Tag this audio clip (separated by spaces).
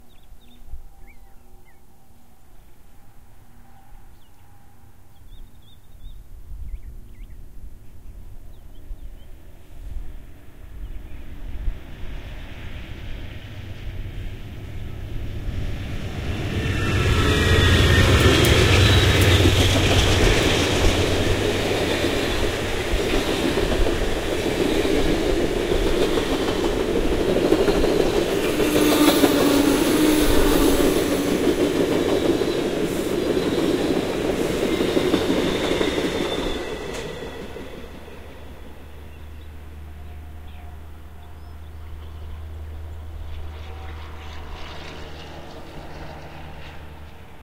airport amatrak ambent field-recording freight milwaukee railroad train truck